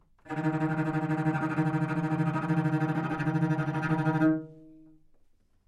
Part of the Good-sounds dataset of monophonic instrumental sounds.
instrument::cello
note::Dsharp
octave::3
midi note::39
good-sounds-id::2052
Intentionally played as an example of bad-dynamics-tremolo